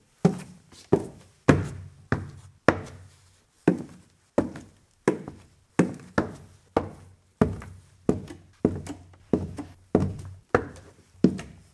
Footsteps Wood Indoor Harder
footsteps, indoor, wood, stairs, house, shoes, foley, inside